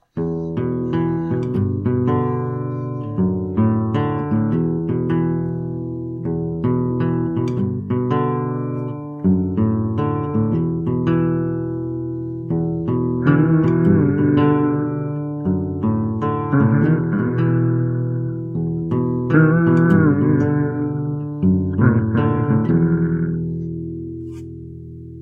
Rock Anthem Intro
This sound is a solo classical guitar rhythm. On the second loop a human humming sound is added.
classic, rock, rythem